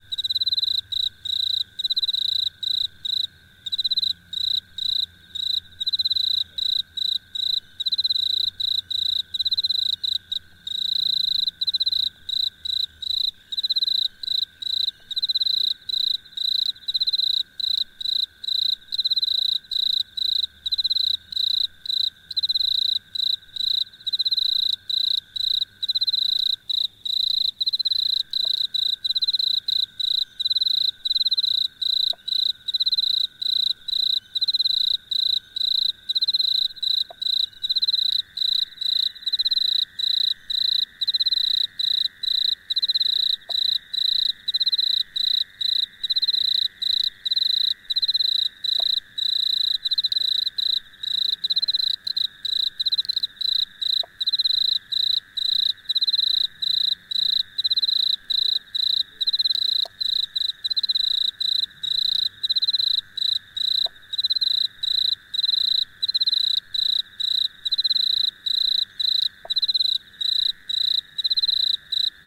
frogs and cicadas near pond
This is a recording of crickets or cicadas near a pond.
There is also a frog that makes occasional clicks in the recording too.
It was recorded on a Zoom H4n, using the internal stereo microphones.
It was recorded around 6pm in the evening.
cicada, crickets, field, field-recording, frogs, insects, nature, summer, vacation, water